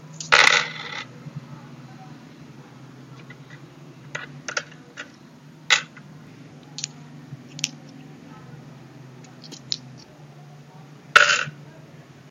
Just did it recently. All I did was throw the coins in my wooden table, picked them up, shake them a bit, and threw it again
money, drop, tossing, dropping, throw, sound, sfx, coin